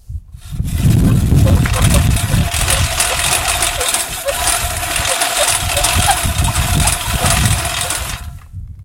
Rotary Lawnmower Short
Rotary blades turning on a manual or push lawn mower through thick grass.
lawnmower, old-fashioned-lawn-mower, push-lawn-mower, rotary-lawn-mower